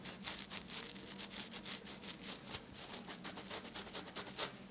20060614.cheek.scratching
sound made scratching my (hairy) cheeks. Sennheiser ME66 >Shure FP24 > iRiver H120 (rockbox)/ rascándome mi peluda cara
beard, body, face, hair, scratching, unprocessed